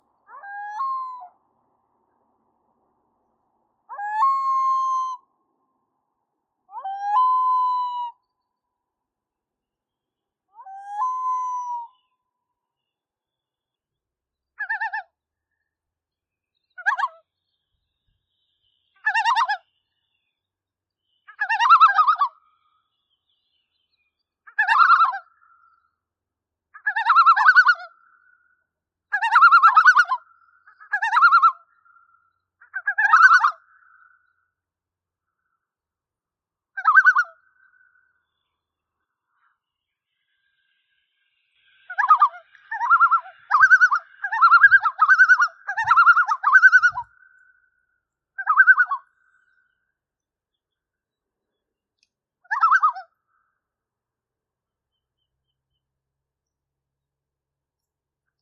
Common Loon. MacDonald Lake, Pierre Grey's Provincial Park, Alberta, Canada. Zoom F8n, Wildtronics Parabolic microphone. Mono. Recorded July 8, 2021